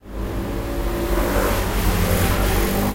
Motorbike passing by
20120118